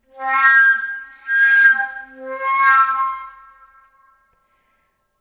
Flute Play C - 14
Recording of a Flute improvising with the note C
Acoustic, Instruments, Flute